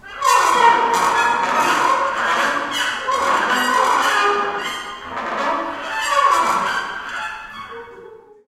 Crazy brass
Some crazy fast brass slides muted recorded in big concert hall with Olympus LS11